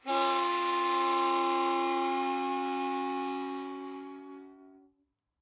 Harmonica recorded in mono with my AKG C214 on my stair case for that oakey timbre.